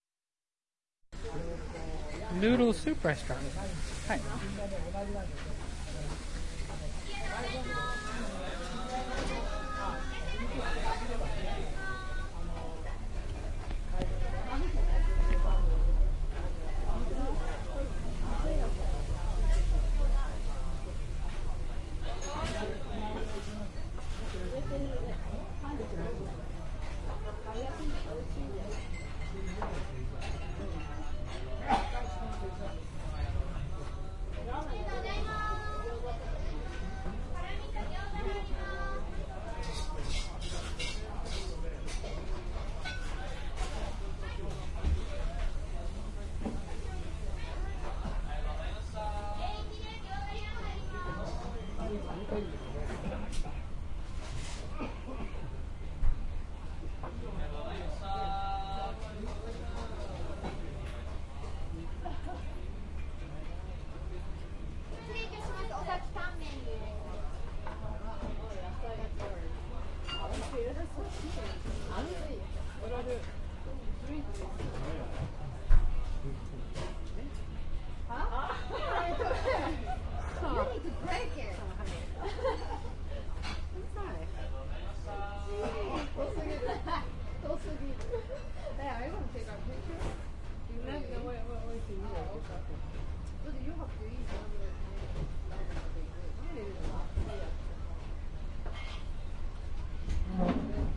Ben Shewmaker - Noodle Soup Restaurant
Recorded in a Noodle Soup restaurant in Saitama.
japan, people, restaurant